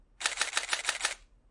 Nikon D750 6x sequence shoot
6 Time shoot sequence with a digital Nikon D750
cam, digital, Photography